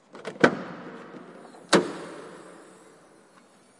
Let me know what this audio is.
20150712 car.door.opens.02
Car door opens in an underground, almost empty parking. Shure WL183 into Fel preamp, PCM M10 recorder